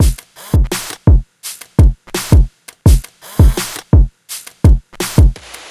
Drum Loop incorporating a Polaroid sample and a kick. 84BPM